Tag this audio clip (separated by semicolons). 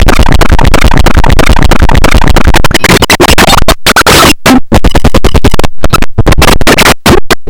bending; circuit-bent; experimental; just-plain-mental